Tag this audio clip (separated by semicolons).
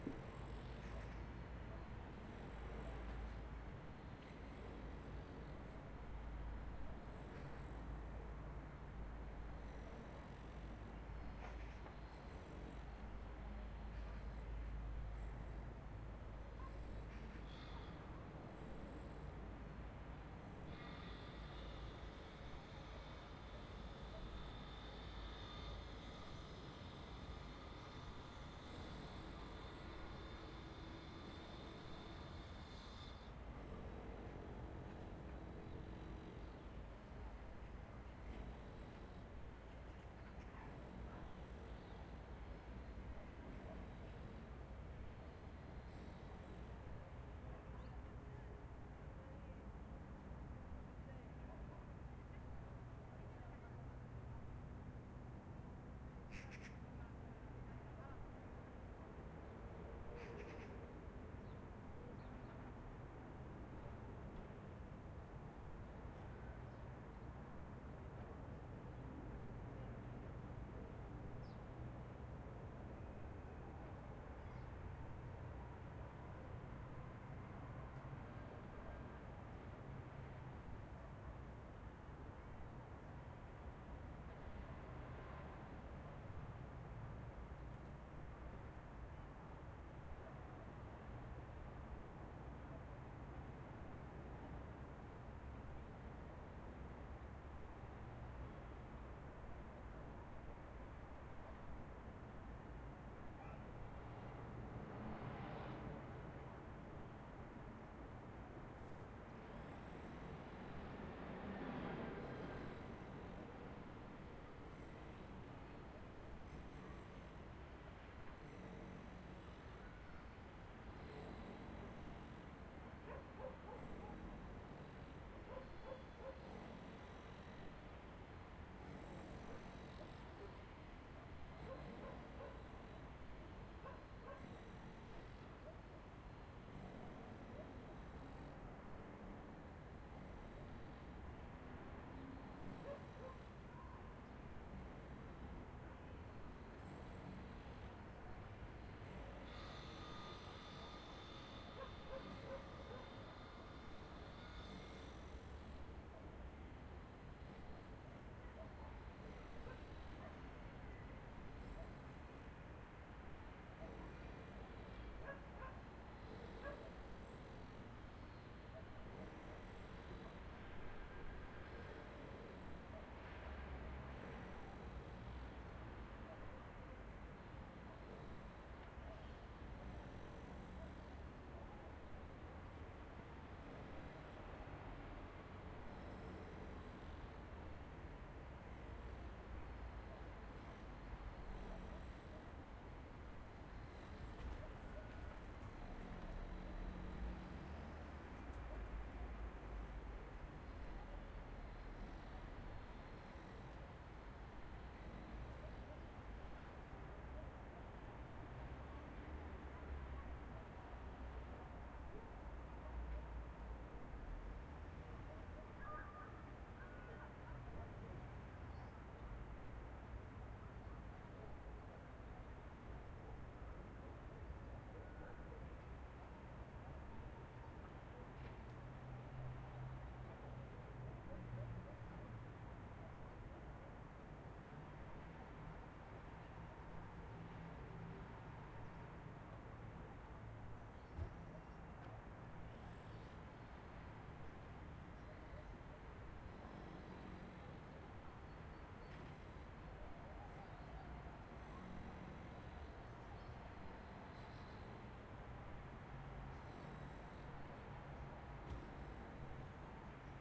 Highway; Transportation; City; Country; Environment; Cars; Countryside; Cinematic; Passing; Atmosphere; Transport; Public; Ambient; Ambience; Village